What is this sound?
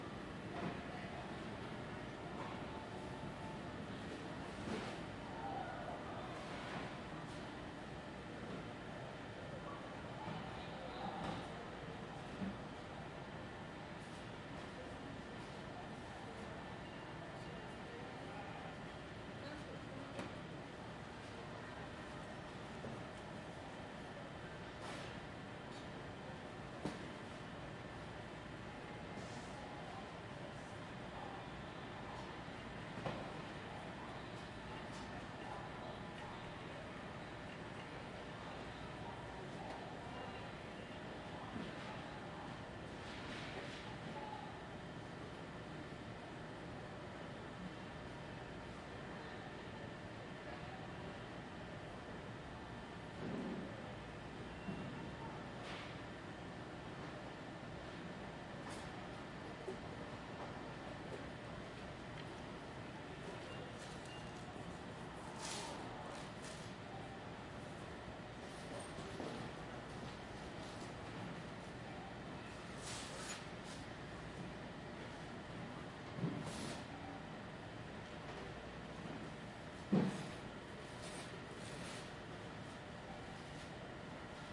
Ambience inside store light Walmart-7eqa 01-02
Recorded with Zoom H4N, ambience recording. Basic low cut filer applied.
field-recording, ambience, backgrounds